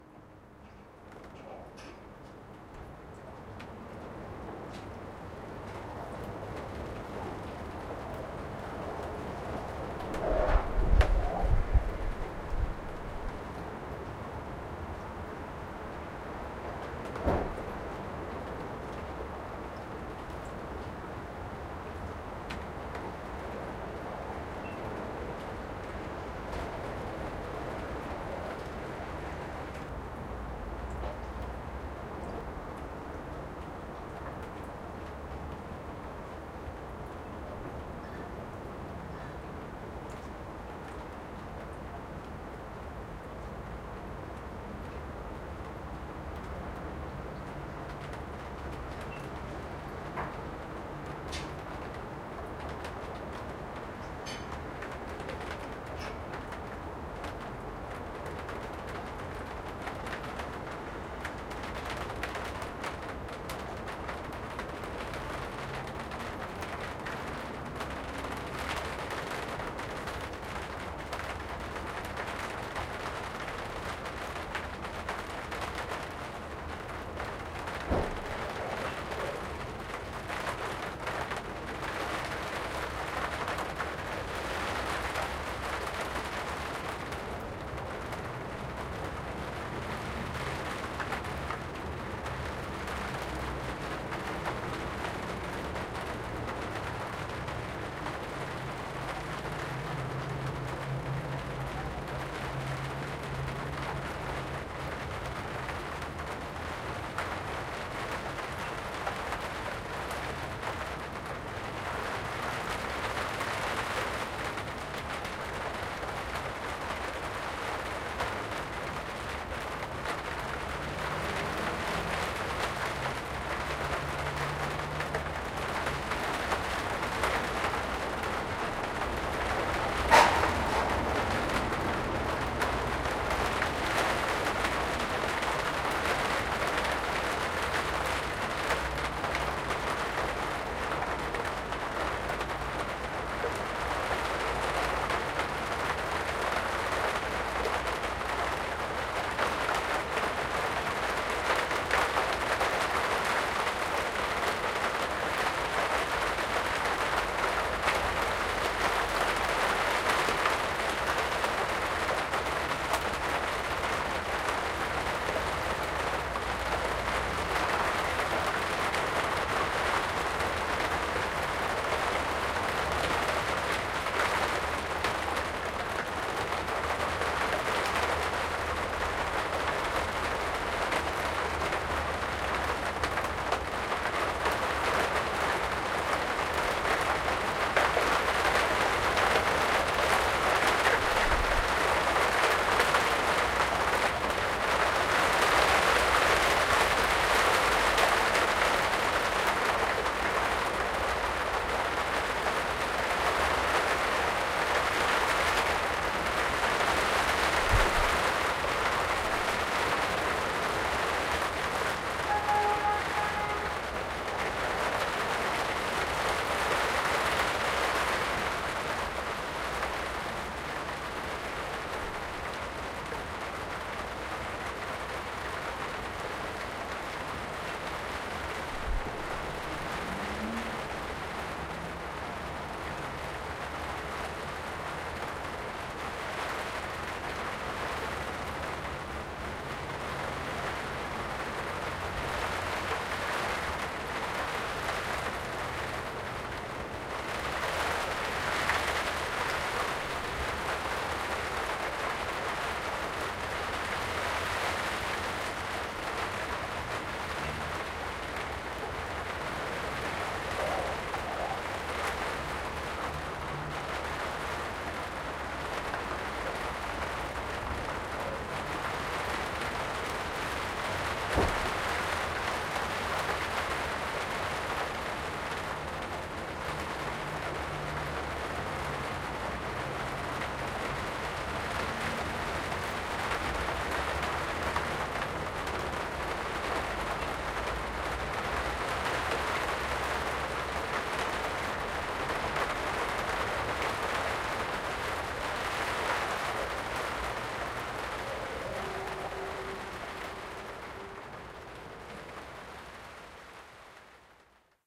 Rain-On-The-Roof
Recording of heavy rain and wind on a sheltered roof top.
Recorded using Zoom H1 V2 and edited in Audacity.
field-recording, rain, roof, top, weather, wind